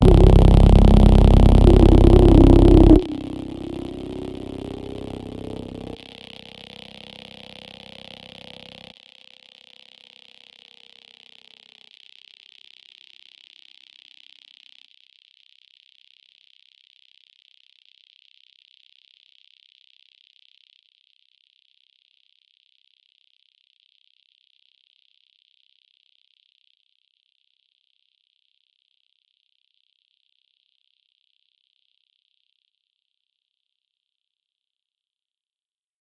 VIRAL FX 05 - C1 - SHARP HARMONIC SWEEP with fading high pass
Short sound with quite some harmonic content, a lot of square content, followed by a fading high pass delay. Created with RGC Z3TA+ VSTi within Cubase 5. The name of the key played on the keyboard is going from C1 till C6 and is in the name of the file.
effect, fx, sci-fi, space